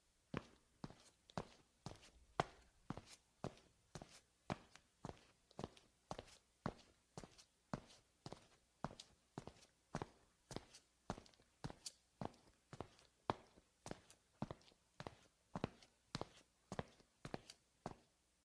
Footsteps, Tile, Male Sneakers, Medium Pace
Sneakers on tile, medium pace
footstep, footsteps, linoleum, male, medium, shoes, sneaker, sneakers, tile, walk, walking